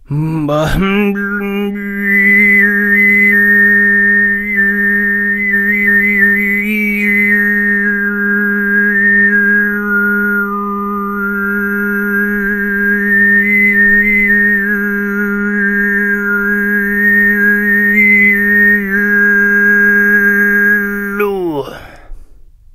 alfonso high 12
From a recording batch done in the MTG studios: Alfonso Perez visited tuva a time ago and learnt both the low and high "tuva' style singing. Here he demonstrates the high + overtone singing referred to as sygyt.
high, singing, throat, sygyt, tuva, overtones